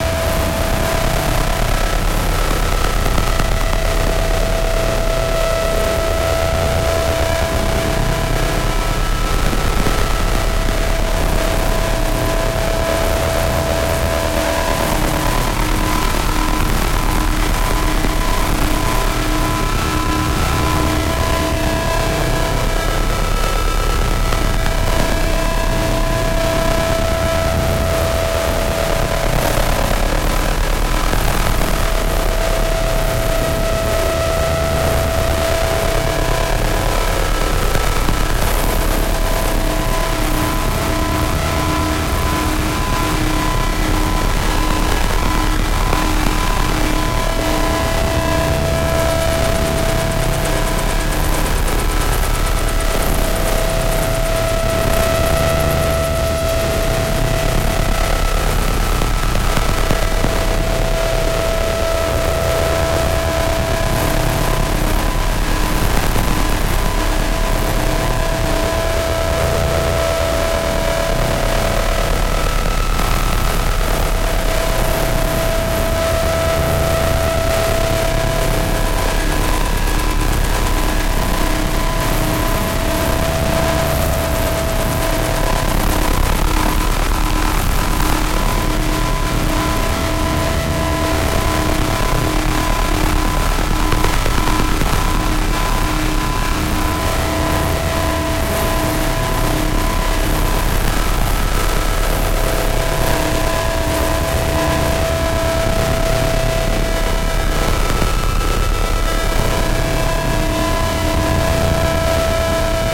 Еvil noise (fm mod)
automation underwent 17 parameters in the modulation matrix
synthesizer ToxicBiohazard (Image-Line)